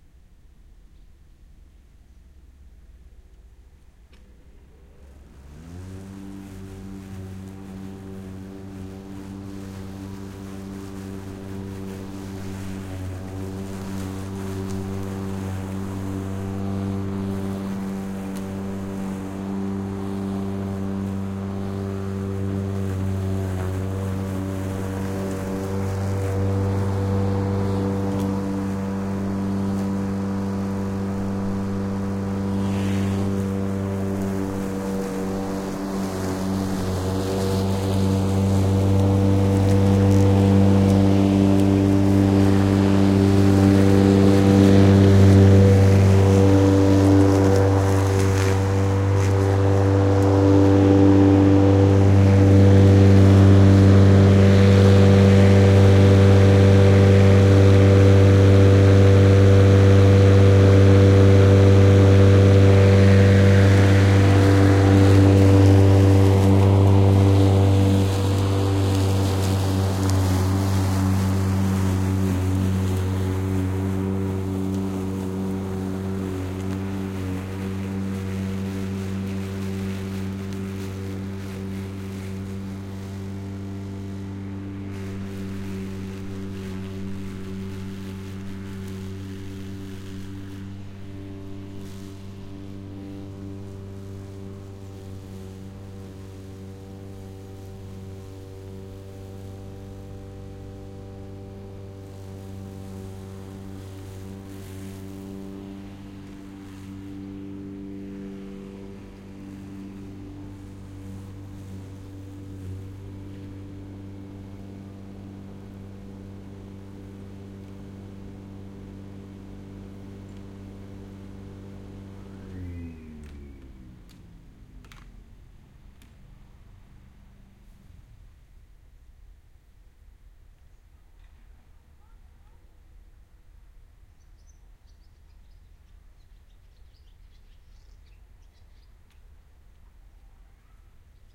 mowinglawn giethoorn fspedit
Mowing a lawn in Giethoorn, the Netherlands around noon. Recorded using 2 Studio the DA-P1 preamps->line into M-Audio transit.
mowing, field-recording, lawn, ambience